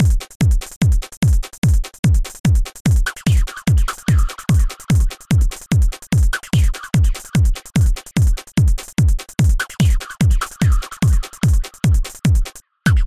steady dance beat uncompressed
dance, beats